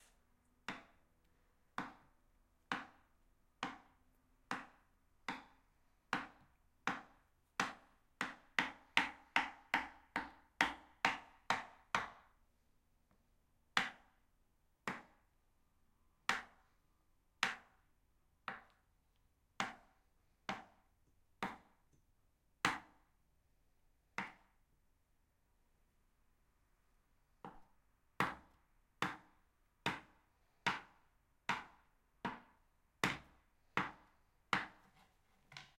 Recorded with a zoom H6 and stereo capsule. A variation of knife chops on a wooden chopping boards.